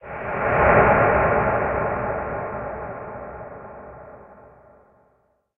underwater explosion effect with reverb